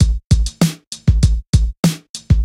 Drumloop A Basic One - 1 bar - 98 BPM (no swing)
beat, 98-bpm, drums, hip-hop, hiphop, drum, drum-loop, rhythm, loop